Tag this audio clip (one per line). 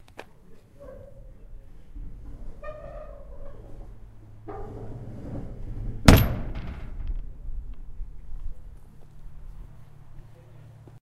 industrial
open